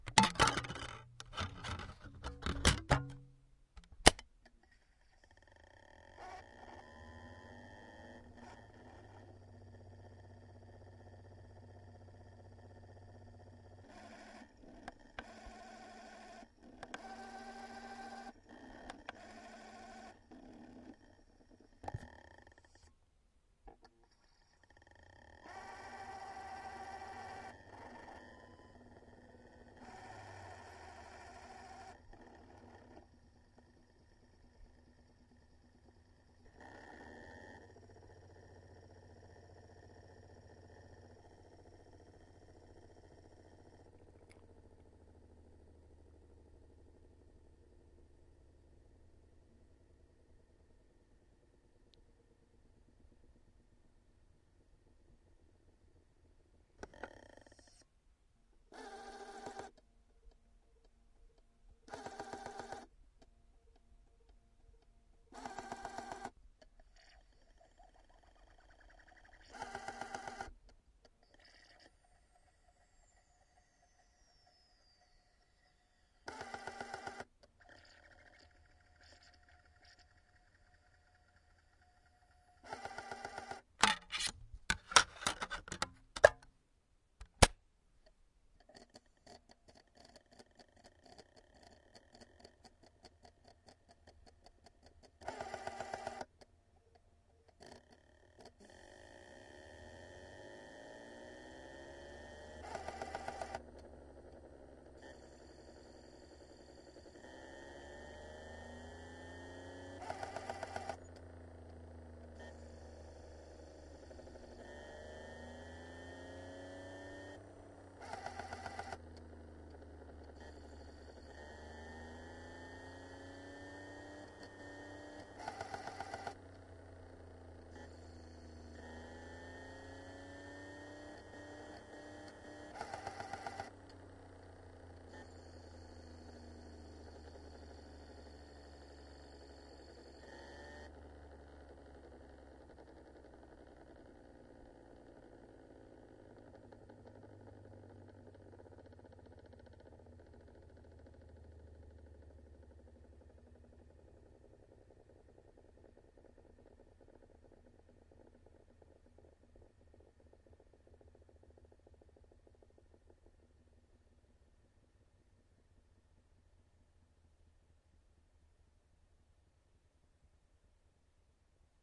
Sounds of a CD ram on the Discman. I put there normal audio CD, CD up side down and then blank CD. Recorded with Zoom H1.